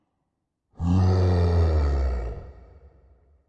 Deep Exhale Echo
Deep Exhale Creature Monster Echo
Exhale, Echo, Monster, Deep, Creature